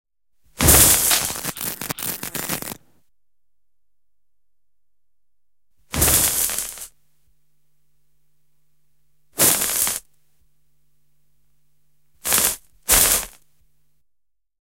Sähköisiä räsähdyksiä / Electrical, short crackling, cracking, hissing sounds, like short-circuit, electric fault or electric shock, a close sound
Sähköisiä sähähdyksiä, esim. sähkövika, oikosulku, sähköisku. Lähiääni.
Äänitetty / Rec: Analoginen nauha / Analog tape
Paikka/Place: Yle / Finland / Tehostearkisto, studio / Soundfx archive studio
Aika/Date: 1980-luku / 1980s